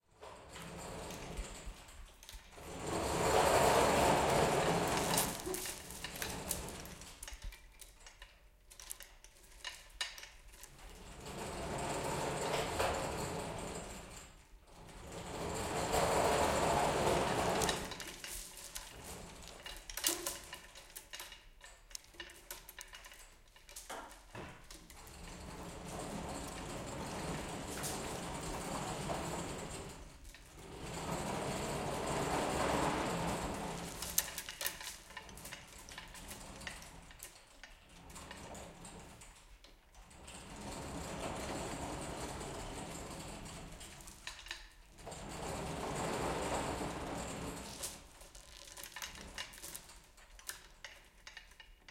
metal shop hoist chains thick rattle pull on track1
chains, hoist, metal, pull, rattle, shop, thick, track